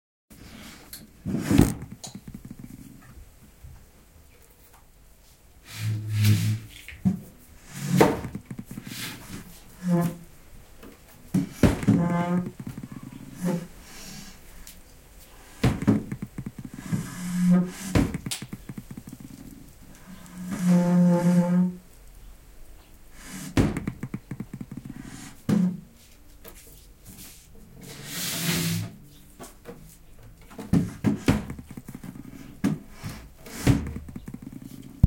mesa golpe / hit table
knock, wood